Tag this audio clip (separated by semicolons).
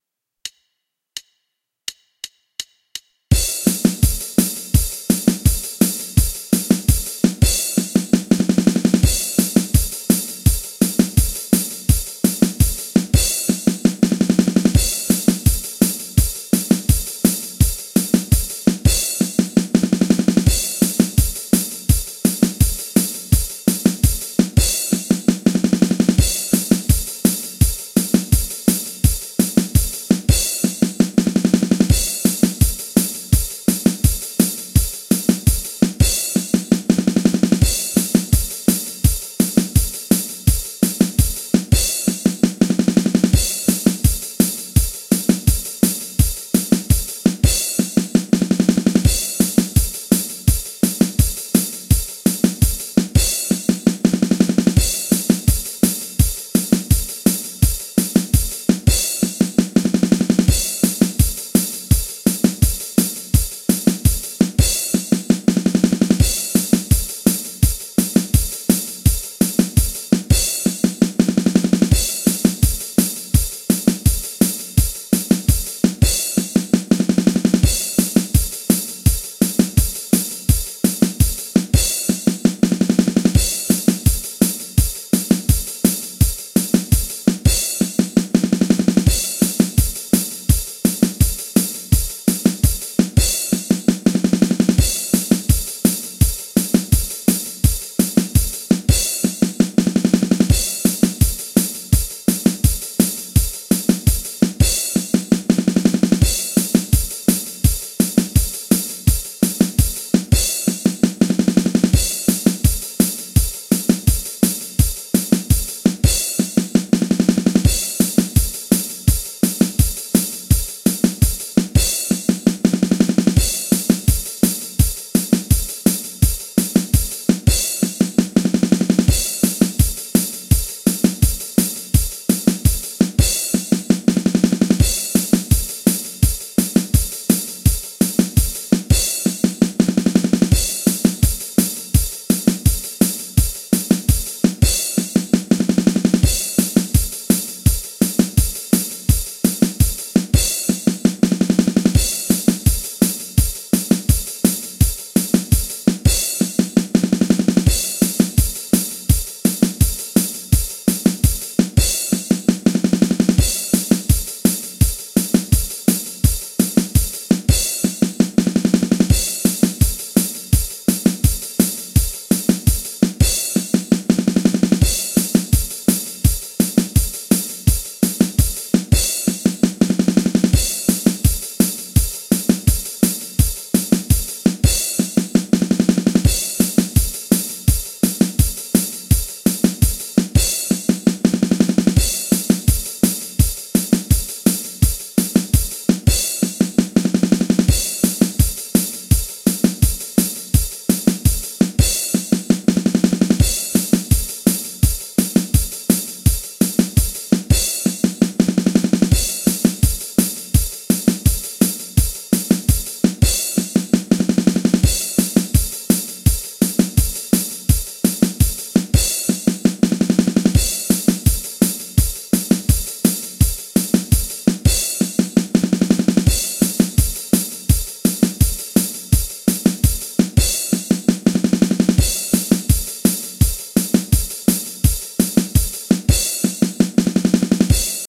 beat; digital; drum; n; pad; rock; roll; yamaha